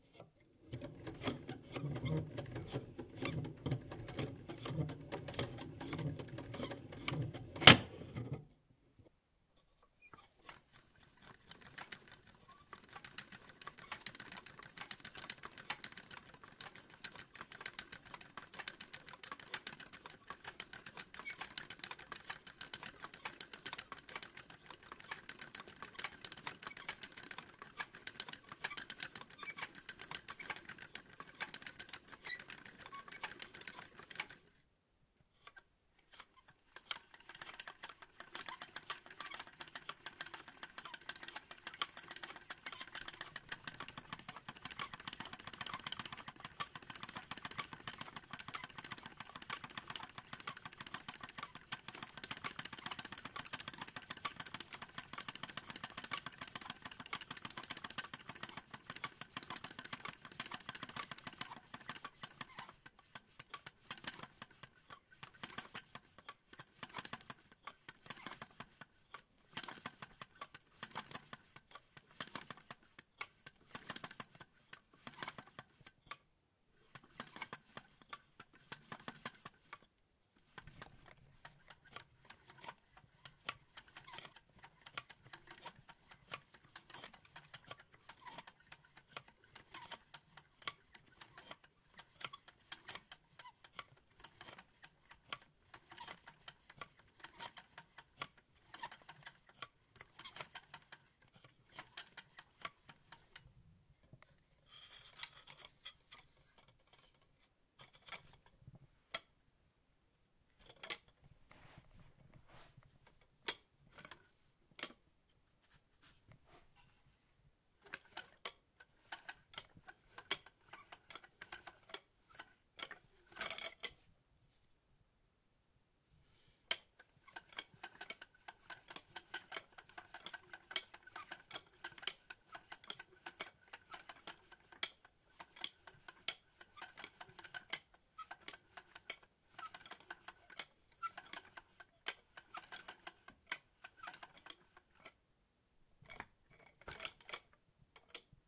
Wooden Spinning Wheel
I found an old Spinning Wheel (the ones used to make Yarn out of Wool) in my parents Attic and recorded it.
In the first part i accidentaly disconnected some of the joints, that is the louder Thonk you hear. I repaired it for the sounds after that.
I tried using it at different paces in the later part, tried to simulate the accurate pace when using it with a foot. Sadly I had no wool to simulate that being pulled through.
Could also work for a smaller wooden barrow.
adpp, mechanical, Spinning, Wheel, wooden